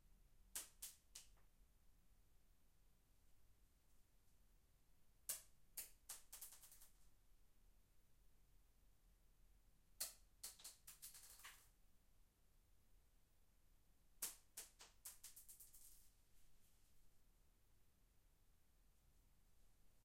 Collection of some shell casings, recorded in a big room.